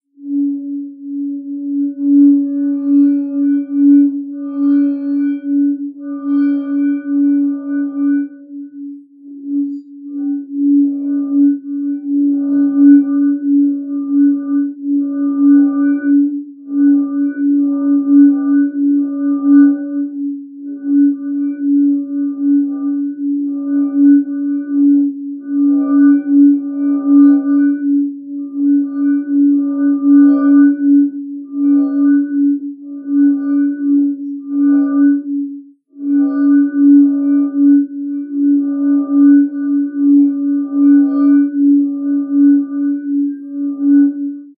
lucid drone 17 dry halfspeed
A wet finger rubbing a rim of an empty wine glass lowered an octave down.
Ho, ho, ho! Merry Christmas and Happy New Year 2018!
It's been a long while since I've uploaded 'Lucid Drone' sample which proved the most popular and demanded among my lot. Now here comes a newer version. Essentially this is just a sound of a wet finger rubbing the rim of an empty wine-glass. The sound is rendered half-speed, i.e. it is an octave down from the original.